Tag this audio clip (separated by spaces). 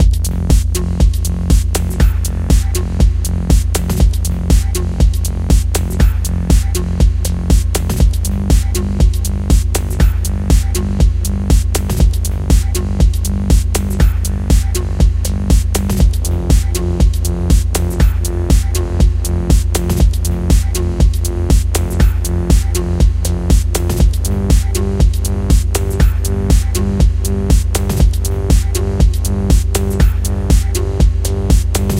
live beat electronic drum techno electro n dance bass original loop house music